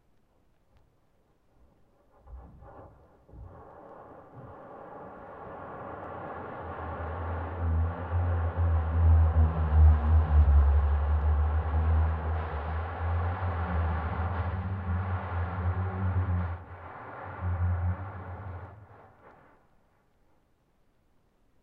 KELSOT38 hydrophone slide deep resonance
Booming sound created via an avalanche on Kelso Dunes.
boom, droning, usa, kelso-dunes, dunes, singing, field-recording, sand, mojave-desert, hydrophone, musical, california